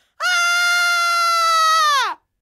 a girl shouting for a terror movie. 666 movie scream UPF